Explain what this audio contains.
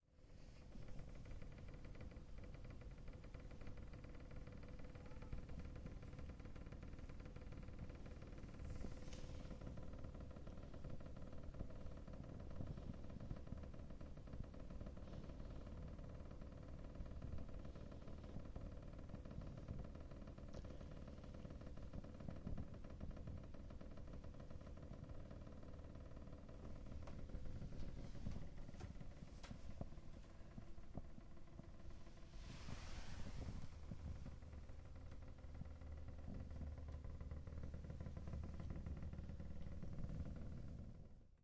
jittery roomdoor home April2012
A recording of the door to my room, which shakes slightly on its hinges in the cold or low humidity, you can hear a bit of my breathing and some hand sound as I tried to manually pan the jittering sound. Recorded with a ZoomH2 for Dare12.
jittering, wood